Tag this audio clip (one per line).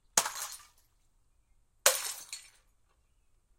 Beer-bottles-breaking
Liquid-filled
Bottle-smashing